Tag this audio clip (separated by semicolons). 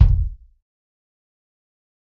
dirty; raw; tonys; pack; drum; kick; realistic; punk